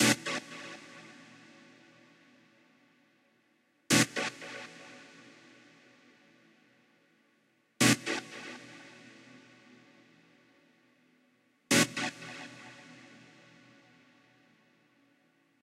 An energetic chord sequence repeated several times. Sounds like throwing some sonic fluid in space.
Both synthetic and organic.
Would fit for house or trance or any kind of music as long as you like synthesizers.
123bpm
Burst, Wide, 123bpm, Tape, Saturation, Trance, Energy, Sequence, Pad, Punch, Chords, Character, Power, House, Loud, Deep, Crunch, Synth